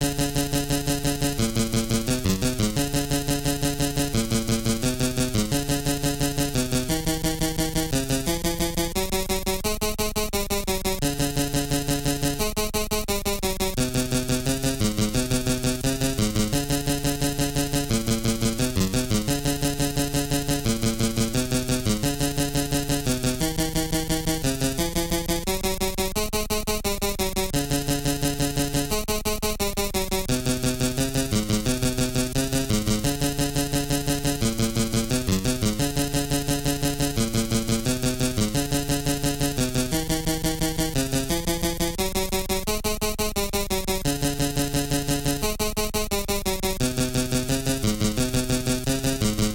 Lost Moon's -=- Miners Task
8bit bass line kind of wonky but with a few catch's
blix, chip